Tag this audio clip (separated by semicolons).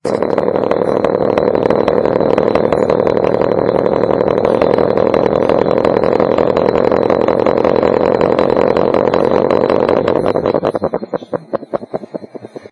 lid; spin; metal